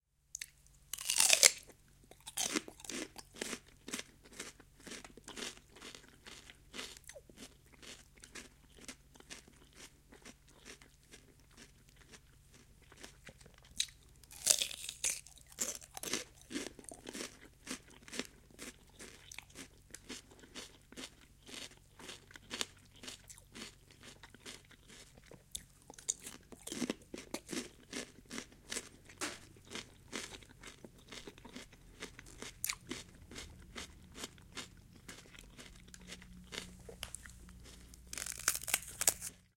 Eating Peppers
chef, EM172, LM49990, Primo, vegetables